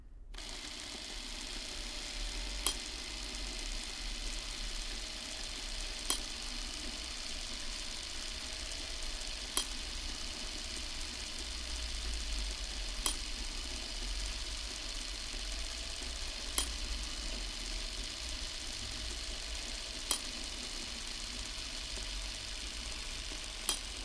Camera 16mm - Keystone Criterion Deluxe (agudo)
Motor da antiga camera 16mm do Zé Pintor rodando. Som captado na casa dele com microfone AKG C568B posicionado no lado que produz um ruído mais agudo.
16mm, camera